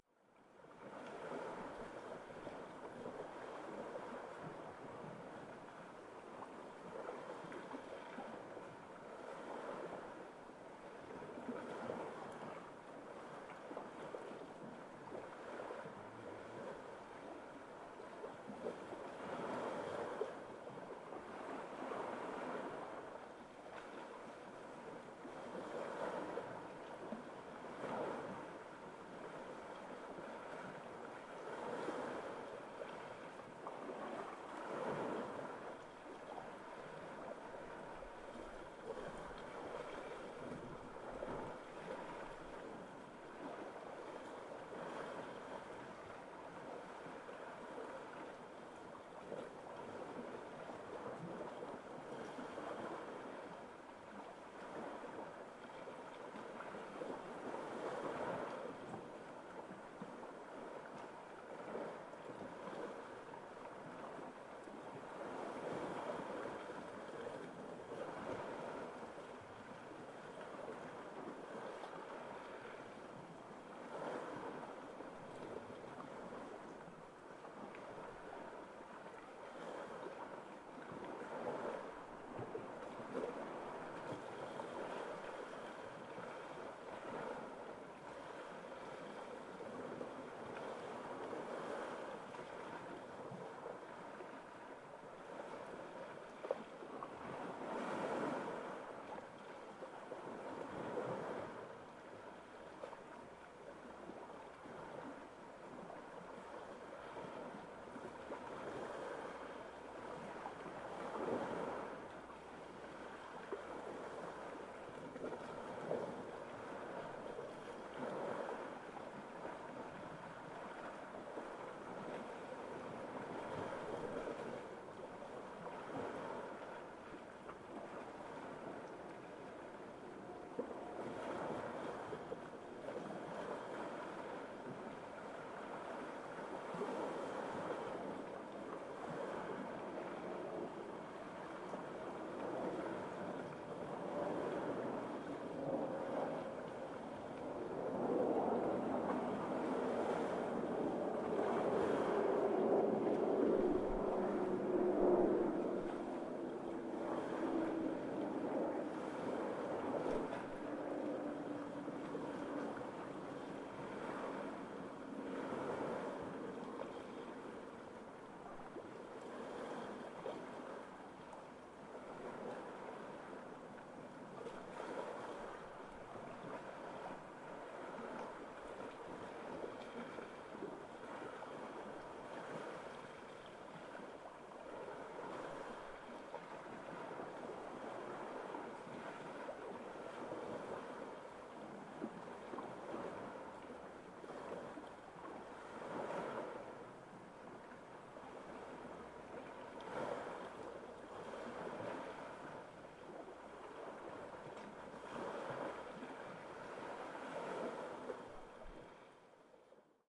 recorded by the sea, near the temple od Poseidon in Sounio, Attica (Athens, Greece) with a ZOOM H5.
airplane, wind, field-recording, morning, sea, water, nature, waves